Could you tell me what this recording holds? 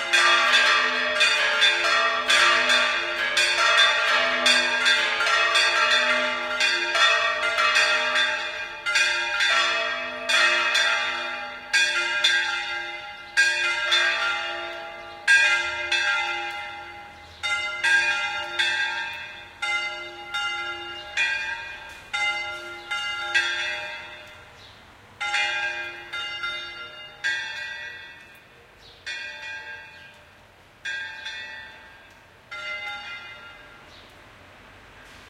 three church bells pealing / tres campanas de iglesia repicando
city, bells, spring, streetnoise, environmental-sounds-research, binaural